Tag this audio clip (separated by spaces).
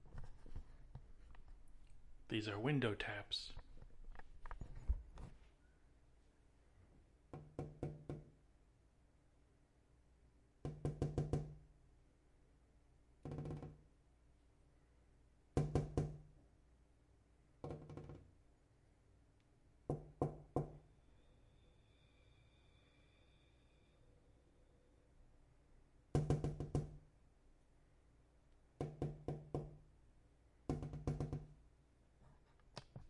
AudioDramaHub foley glass tap window